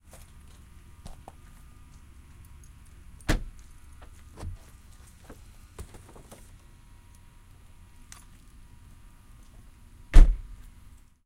This is a binaural recording of unlocking, then opening the trunk of a car. I then place a large box inside, then the trunk closes.